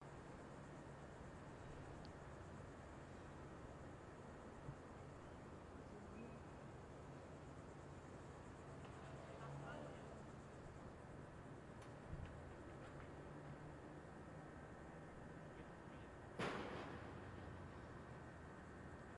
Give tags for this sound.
field-recording
outside

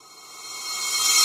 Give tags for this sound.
abletonlive
decay
maxmsp
pvoc
soundhack
time-stretch

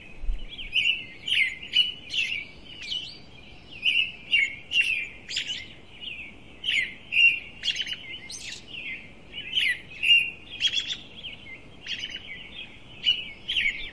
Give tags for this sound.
birds
birdsong
field-recording
morning
nature
nature-sounds
outdoor
outdoors

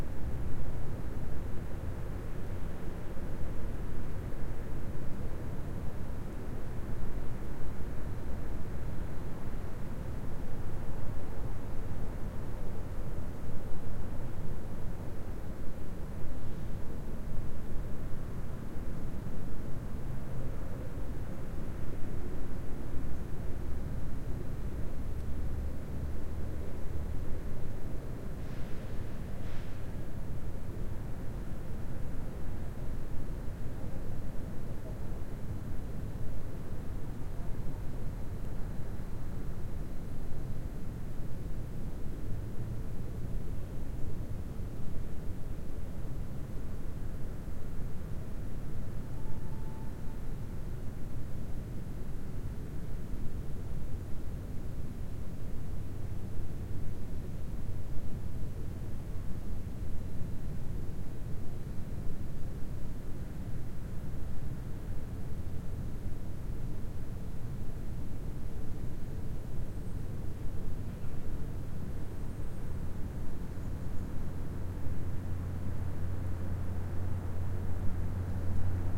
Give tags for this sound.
ambience
ambient
birds
campus
city
exterior
field-recording
morning
school

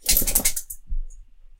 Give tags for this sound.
collar
dog
jangle
metal
rattle
rattling
shake
shaked
shaking
shook
waggle

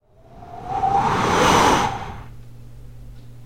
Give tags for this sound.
air
blow
blowing
gust
short-wind
whiff
whoosh
wind
windy

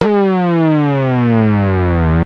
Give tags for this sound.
sound
synthesizer
effect
sfx
fx
game

